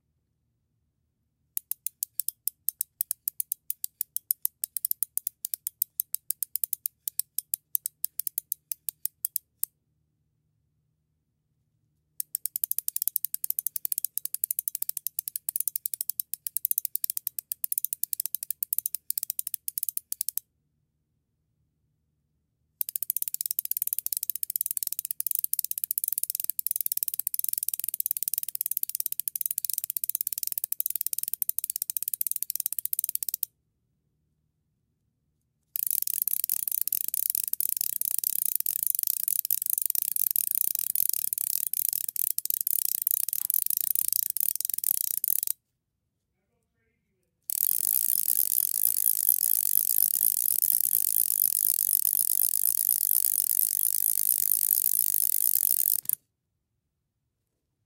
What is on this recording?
machine sound 1 01
A recording of an electric razor my friend and I made for an audio post project